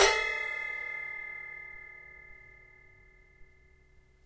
ride bell 1
Individual percussive hits recorded live from my Tama Drum Kit